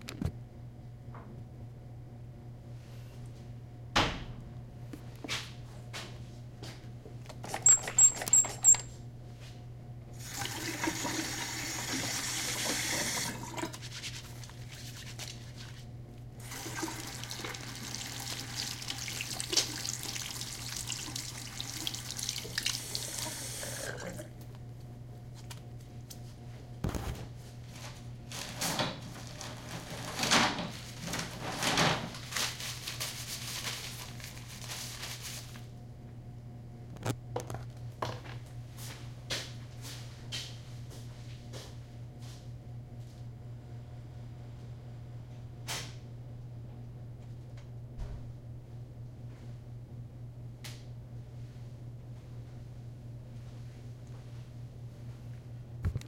Someone washing their hands in a large bathroom, recorded close to the sink.
paper, washing, wash, dispenser, bathroom, hands, towel, water, rinse, soap